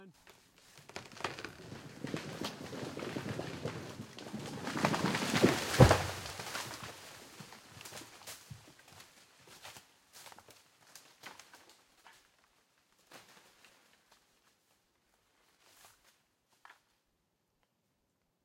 tree-falling-down-in-forrest
My father needed to cut a tree down in the back woods of his house so I took the opportunity to record the sound it made as it plumeted to the ground, and crashed among the other trees
Equipment used
AT875r mic plugged into a Zoom H4N with a blimp to shield from wind
Stay awesome guys!